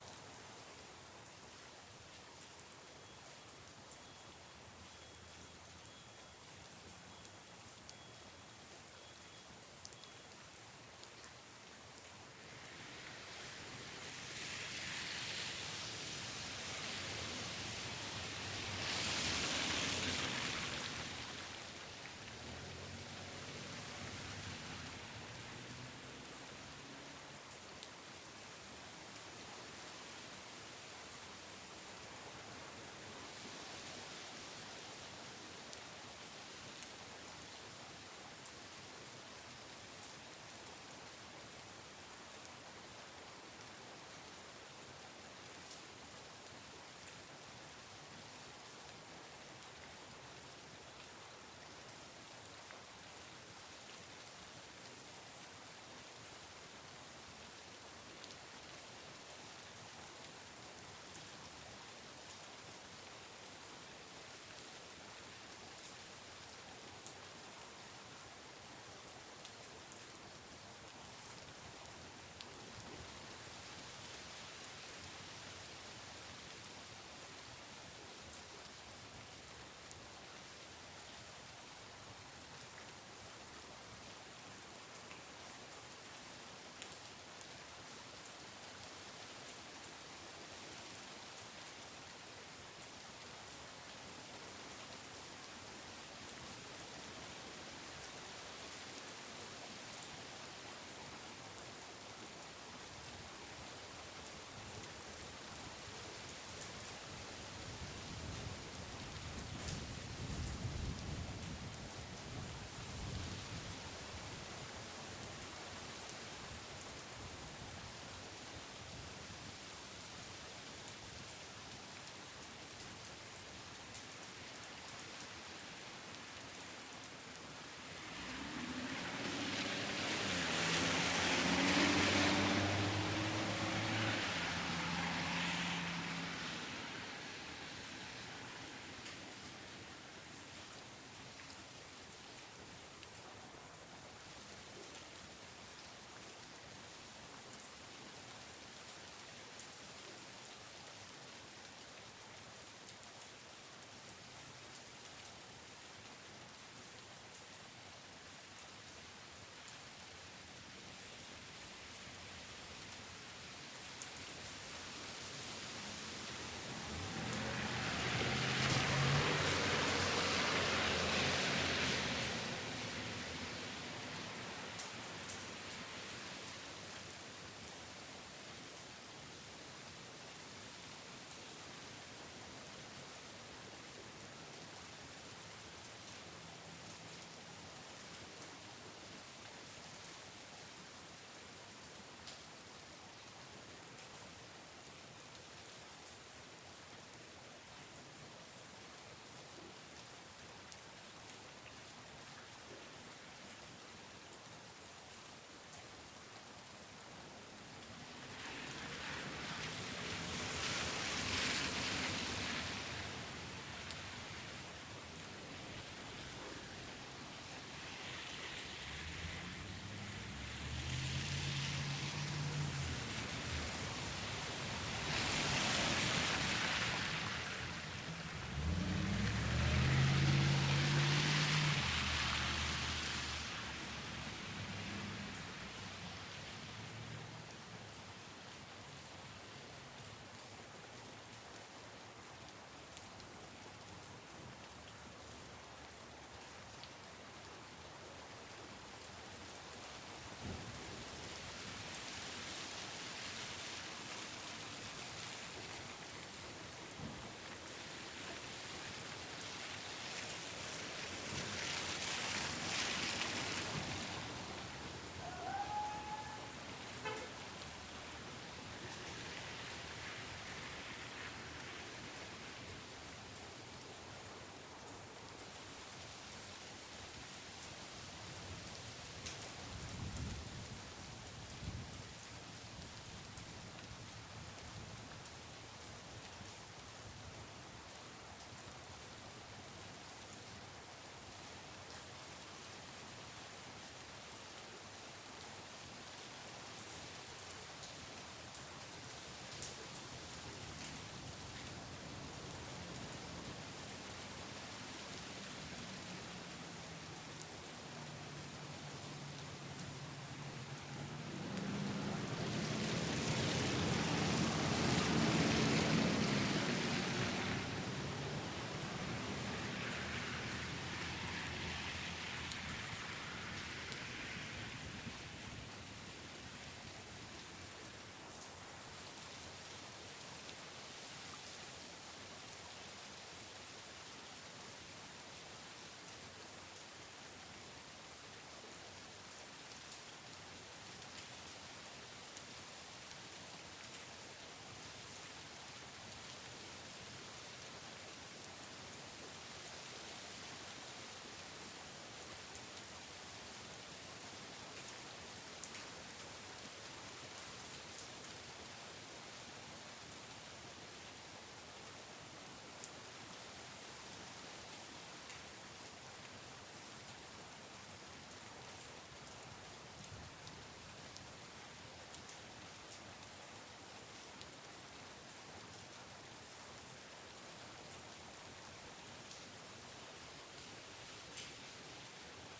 I recorded rain falling on my residential street. Multiple cars drive by at various points, with the nice sound of wet tires and puddles splashing.
Recorded with: Sanken CS-1e, Fostex FR2Le